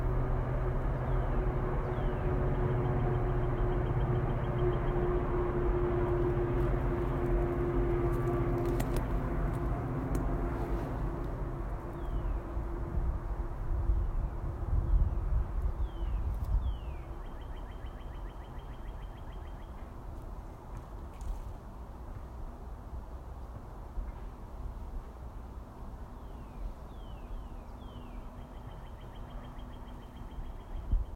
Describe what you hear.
New Jersey Backyard Sounds (airport nearby)
Recording in a backyard in Morristown, New Jersey. You can hear some airplanes fly overhead.
suburbs, suburban, backyard, New, residential, ambiance, neighborhood, nearby, Jersey, airport, birds, suburbia